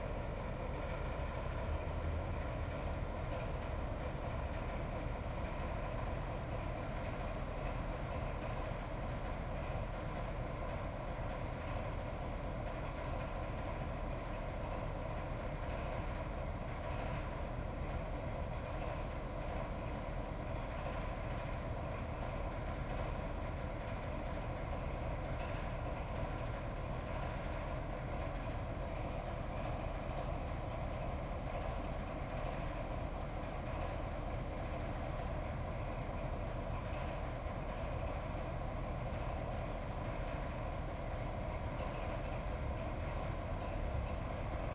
RailStation SmallDieselLocomotiveInFrontDistant--
recording of small maneuver diesel locomotive little distant
rail, diesel, maneuver, station, locomotive, rijeka